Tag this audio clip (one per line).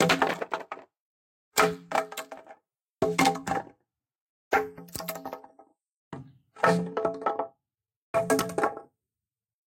lumber
clatter
wood
vibrate
rattle
plank
clank
bounce
drop
fall